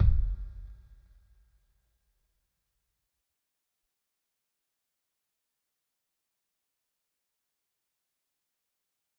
Kick Of God Bed 026
god, kick